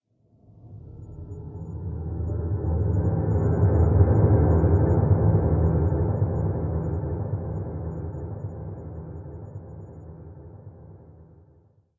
accordion grave
accordion processed sample